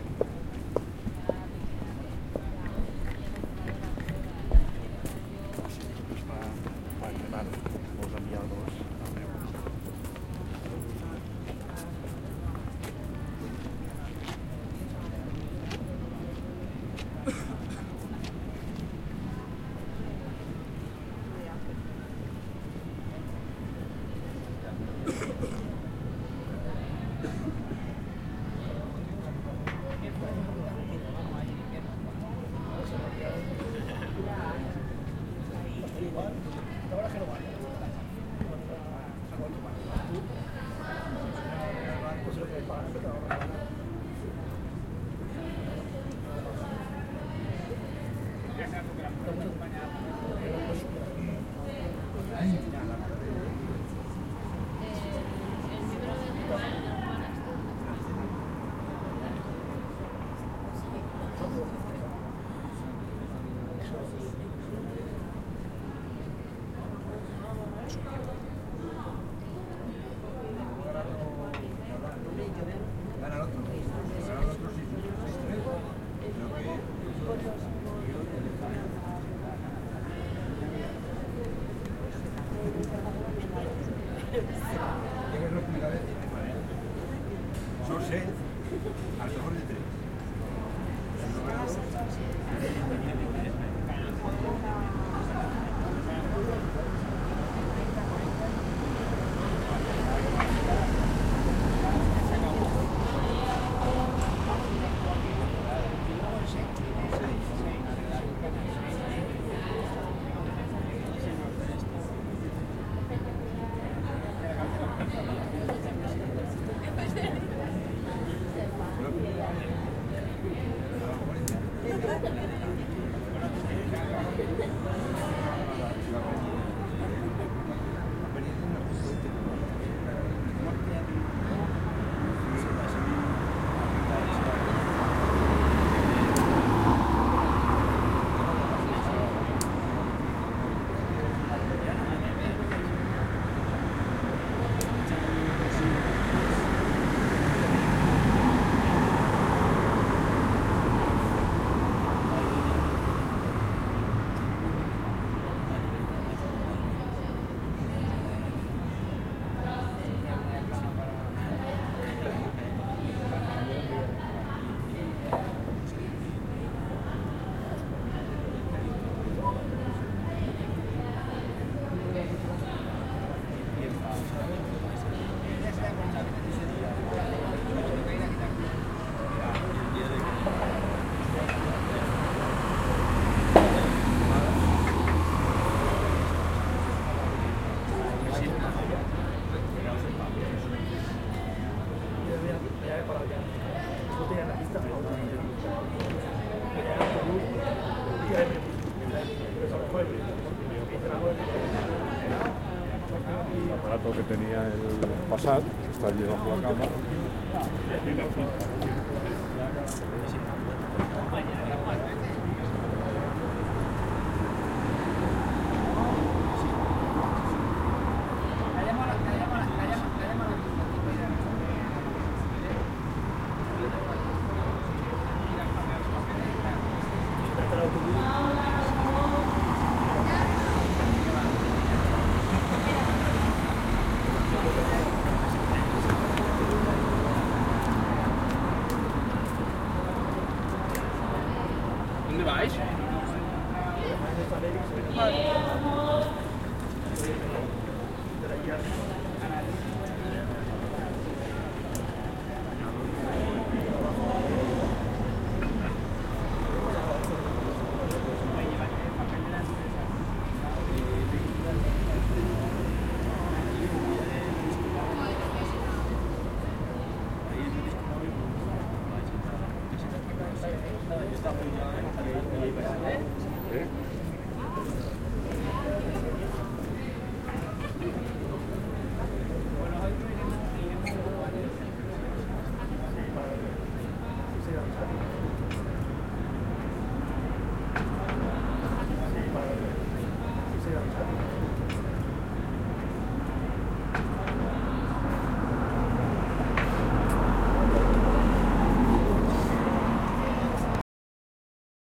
Beach bar
Ambient sound of bar in the beach walk of Gandía in an afternoon of a day of november with calm weather. You can hear people in a quiet bar with an ambient sound of the beach.
a, bar, beach, gand, people, walk